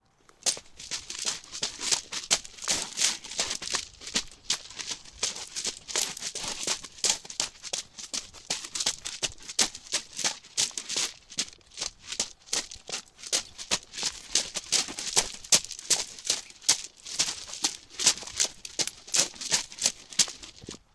walking fast on stones
footsteps on a stony gravel surface. walking fast
fast, footsteps, gravel, run, steps, stones, walk